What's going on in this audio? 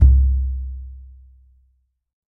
Frame drum oneshot RAW 11
Recording of a simple frame drum I had lying around.
Captured using a Rode NT5 microphone and a Zoom H5 recorder.
Edited in Cubase 6.5
Some of the samples turned out pretty noisy, sorry for that.
world, low, hit, simple, frame-drum, drumhit, drum-sample, perc, recording, sample, deep, oneshot, raw, percussion, drum